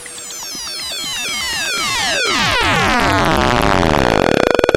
Some knobs turn and interact with sound, oscillators moan and crunch.